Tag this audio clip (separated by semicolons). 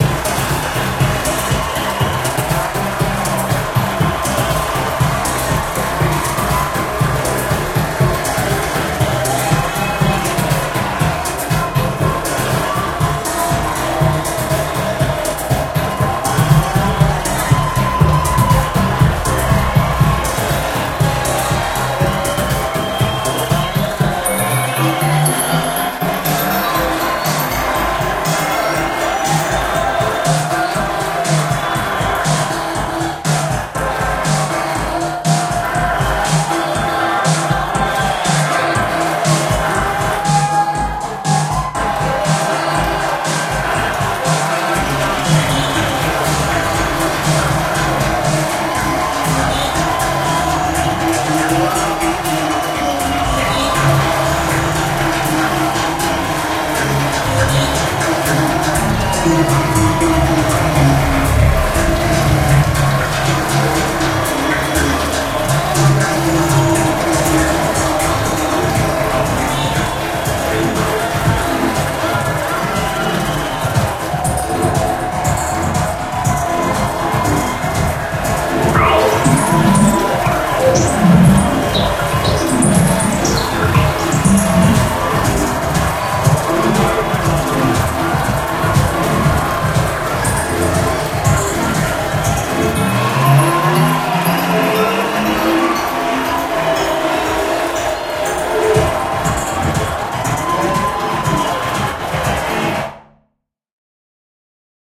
Ambience; Ambient; Atmo; Club; Dance; effect; FX; Peoples; Reverb